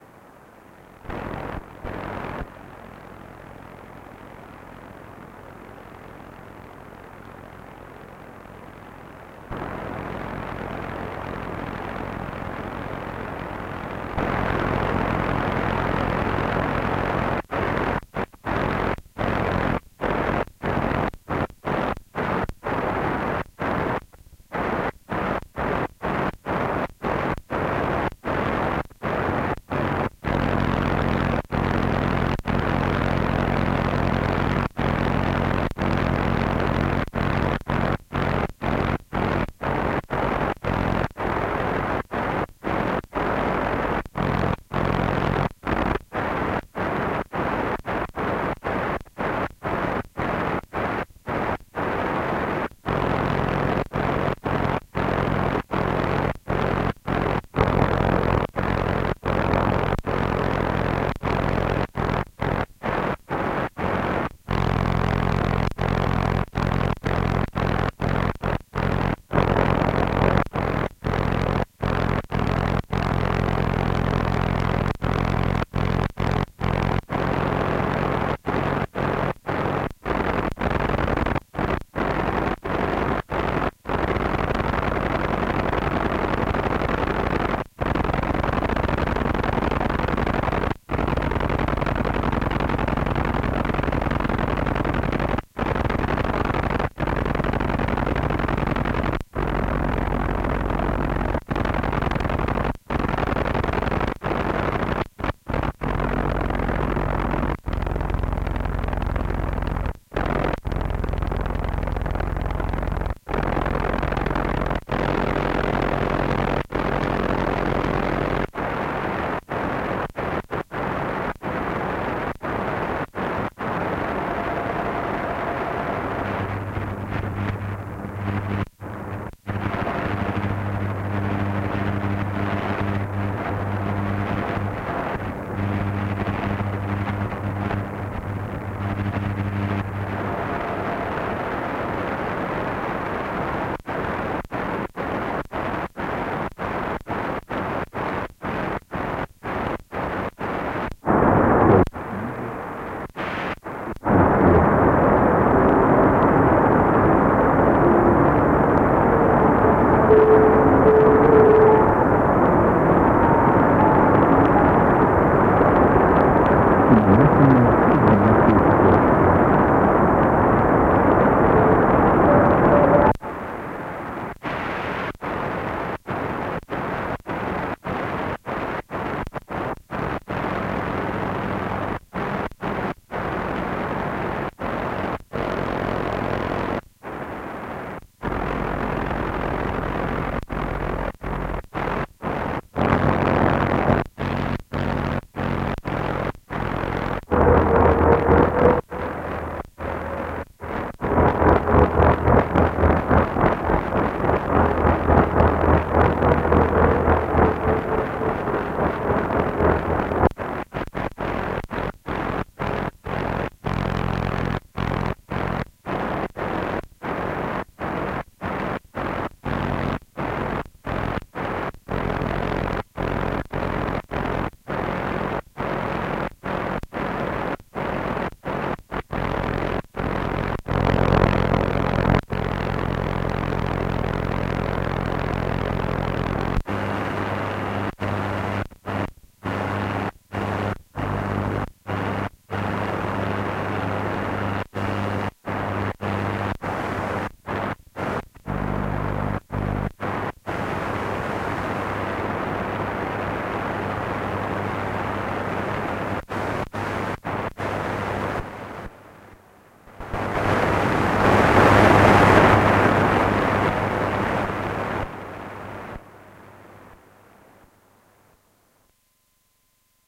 This is me searching radio stations from medium and shortwaves. Recorded straight from my radio headphone output to Zoom h1n. No editing.